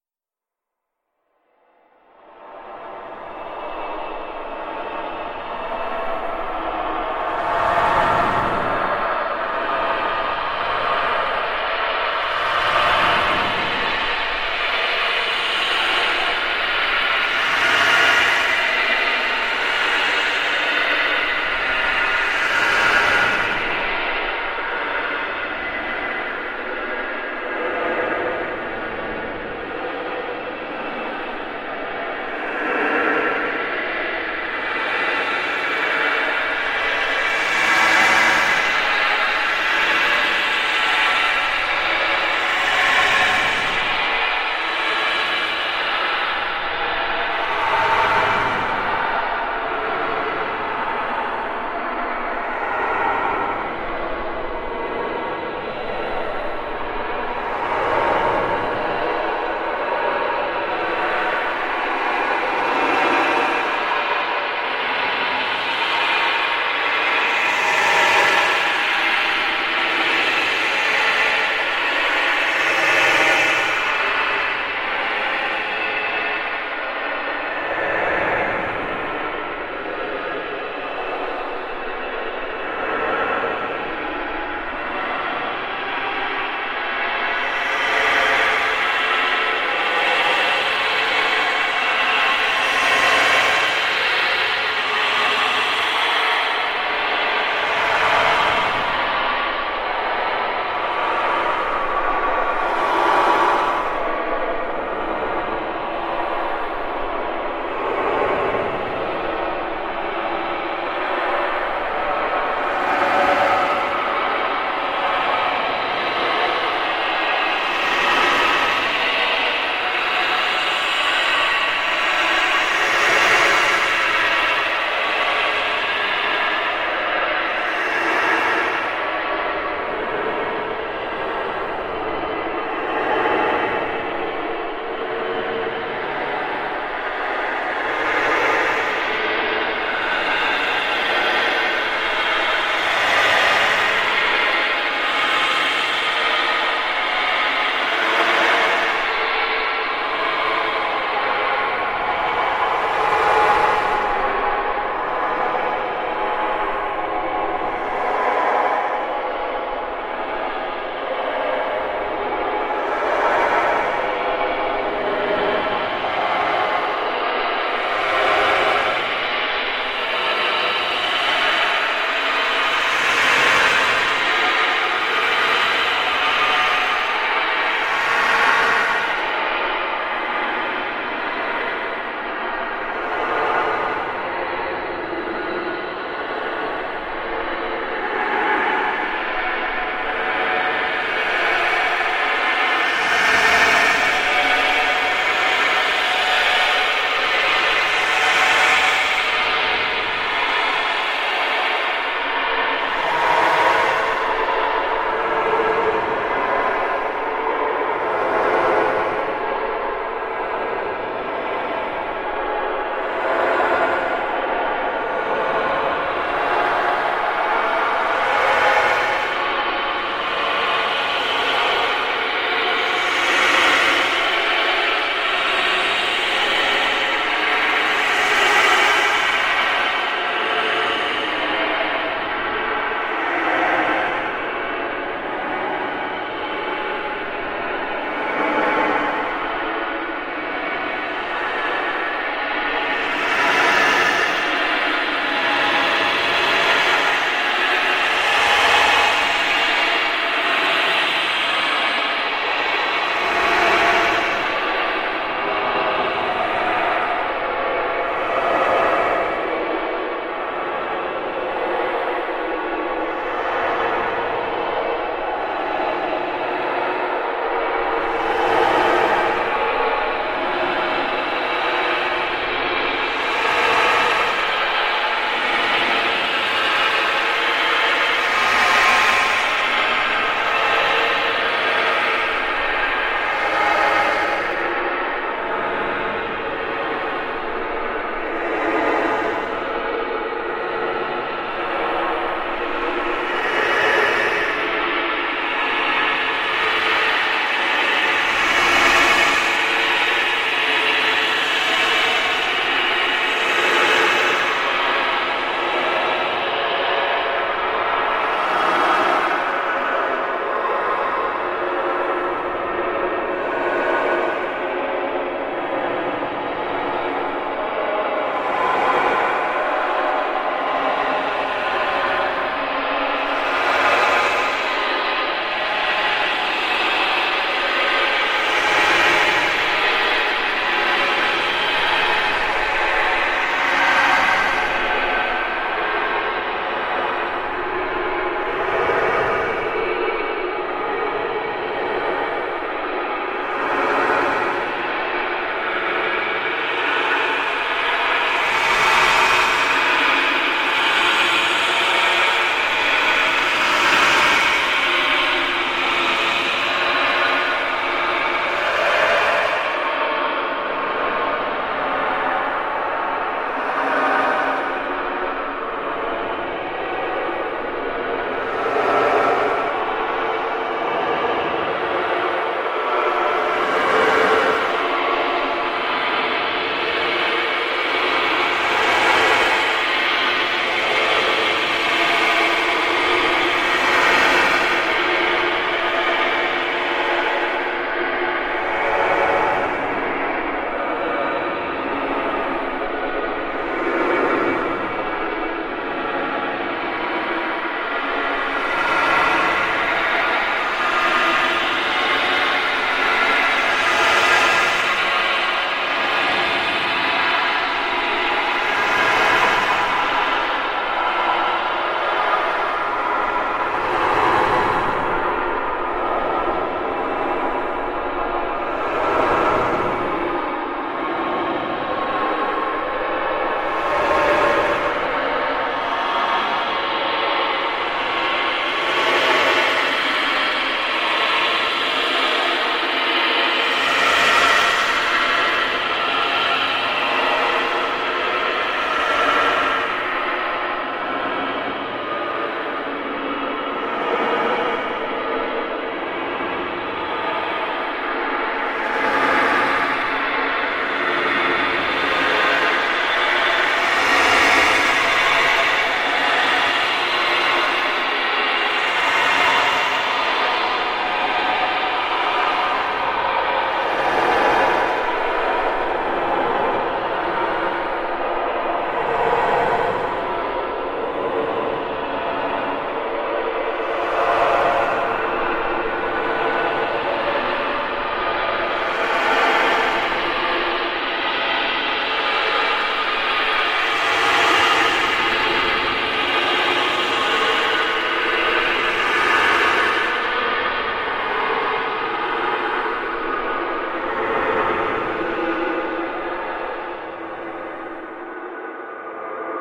Fresh Giant Pipes
Giant, Fresh